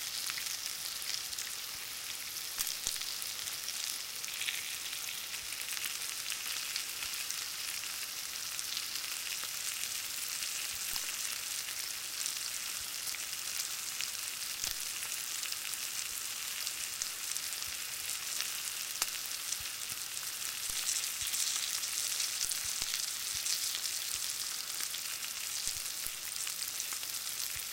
Just bacon sizzling in a pan on a stove.
(Recorded for a vampire short where the vampire is hit by sunlight.)